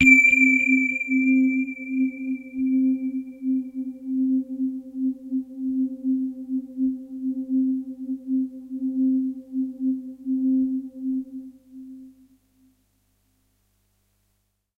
This is a sample from my Q Rack hardware synth. It is part of the "Q multi 011: PadBell" sample pack. The sound is on the key in the name of the file. A soft pad with an initial bell sound to start with.
waldorf, synth, electronic, bellpad, pad, bell, multi-sample